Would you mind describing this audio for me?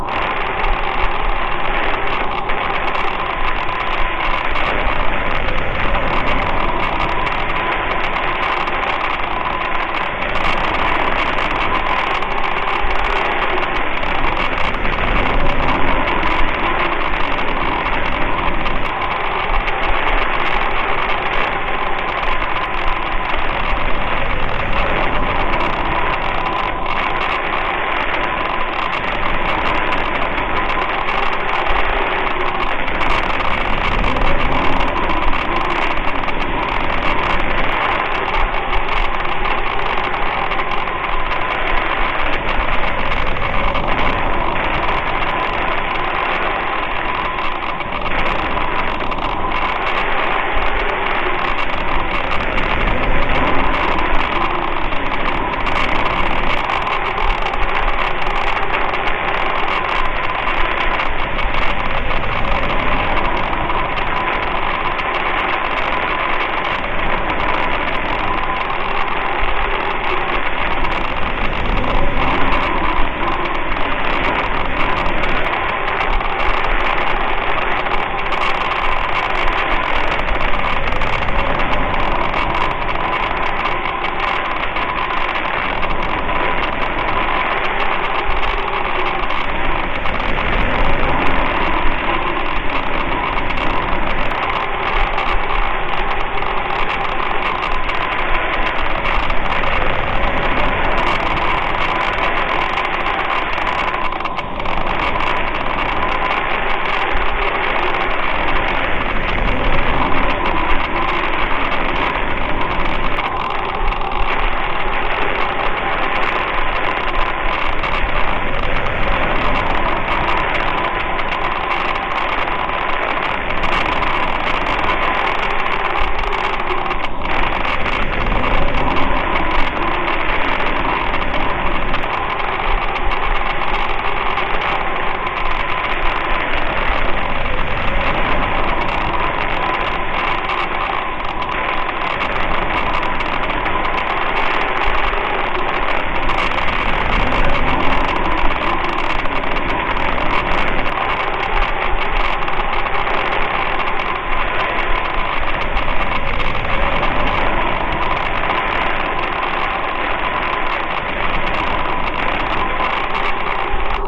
This is a combination I put together for a nuclear holocaust themed sleep video I did that mostly features the sound of a Geiger counter, radio static, and wind. I tried to pull sounds together that gave a real post-apocalyptic ambience.